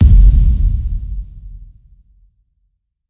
Hard DP03
This is a heavy bass-drum suitable for hard-techno, dark-techno use. It is custom made.